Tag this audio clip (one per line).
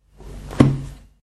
book
household
lofi
loop
noise
paper
percussive